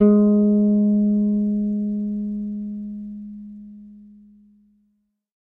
Third octave note.
bass, electric, guitar, multisample